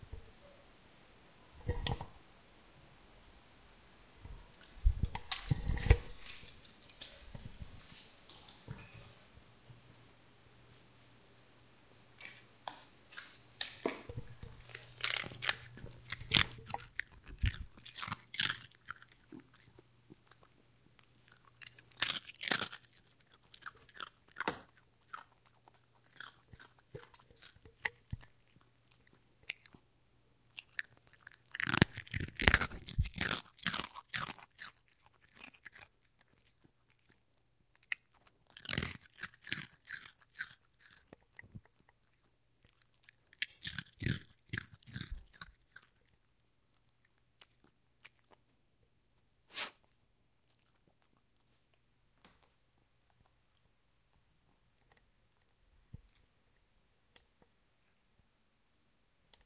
Dog Chewing Snack
Sound of my dog happilly chewing a snack.